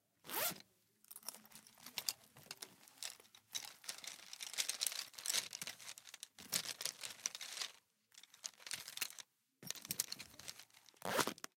School Case with Pencils 01
Opening a school case, rustling of pencils inside the case and closing it.
open, pencils, school, uam, zipper